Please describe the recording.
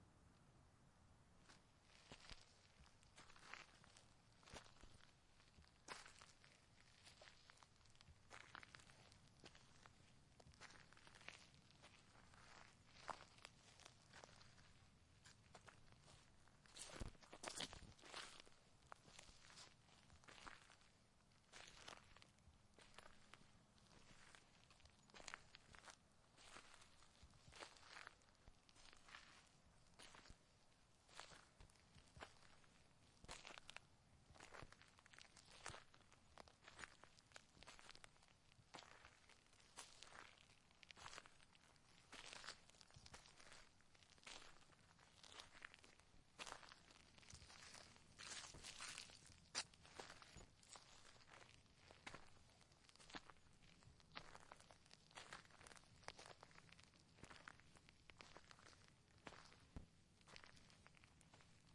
Slow paced walking on gravel
Fieldrecording, rocks, Gravel, Footsteps